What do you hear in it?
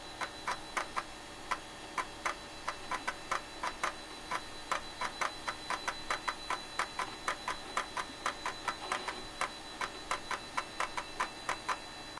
reading/loading sound of the Floppy drive (version 1)